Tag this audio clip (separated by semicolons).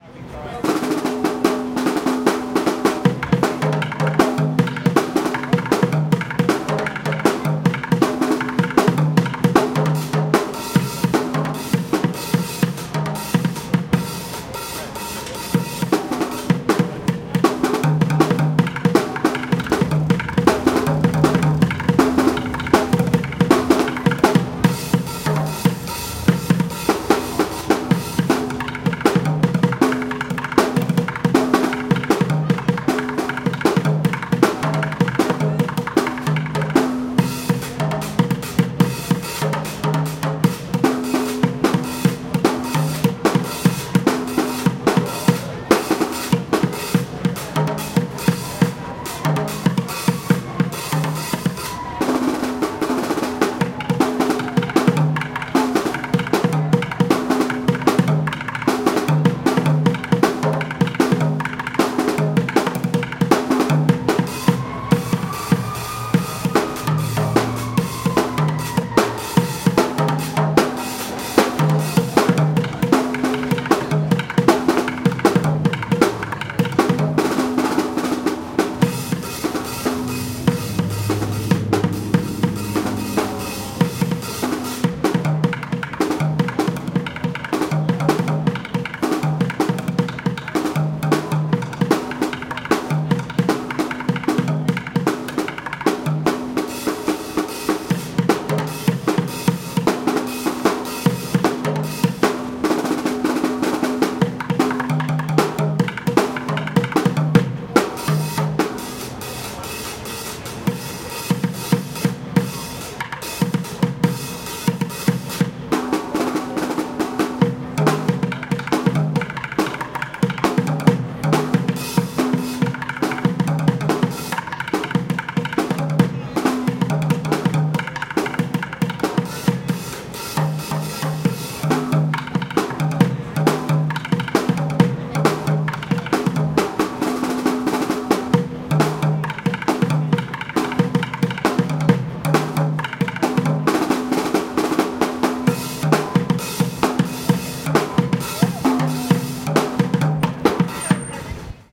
production; beats; drums; Washington; dc; gogo; drummer; beat; bucket; drum